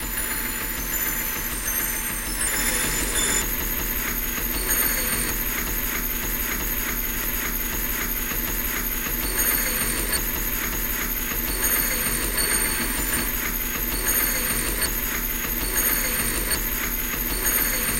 these are some rEmixes of hello_flowers, the ones here are all the screaming pack hit with some major reverb
cut in audacity, tone and pitch taken down and multiplied compressed,
and run through D.blue Glitch, (mainly a stretcher a pass a crush and
then a gate etc.) There are also some pads made from Massive.
ambient, remix, ugly-organ